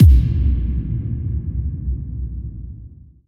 Awesome Kick Drum
Here is a boss kick drum beat which I edited together for some video titles I was working on.